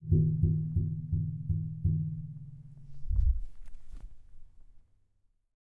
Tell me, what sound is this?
Metal Radiator Tapped Deep
Tapping on metallic object. Recorded in stereo with Zoom H4 and Rode NT4.
metal, radiator, tap, knocking, knock, heating, iron, tapping, object, metallic, deep, rhythmic, hollow